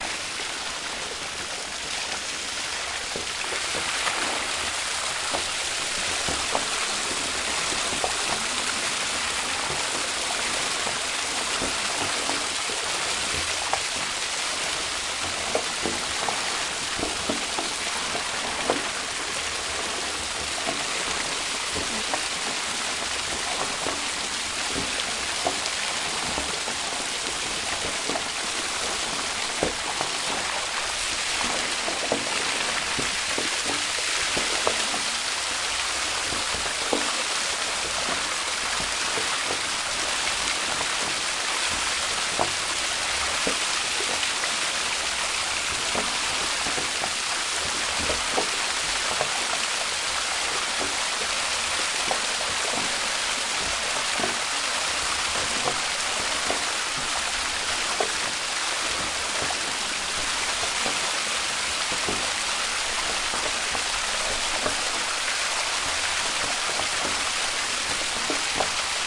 City park. Tube with hot mineral water. Gurgle of pour out water into lake.
Recorded: 17-03-2013.
XY-stereo, Tascam DR-40, deadcat